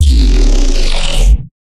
Crunchy Vowel 3
Vocoded bass using my voice and a saw wave. Then resampled multiple times using harmor, followed by reverb techniques.
Bass, Crunch, Fourge, Neuro, Vocoder, Vowel